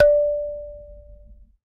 SanzAnais 74 D4 doux b
a sanza (or kalimba) multisampled